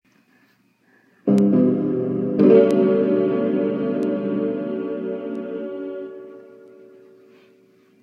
Nostalgia Computer Startup - 1
Once again, this is from my Casio keyboard.
90s, computer, nostalgia, windows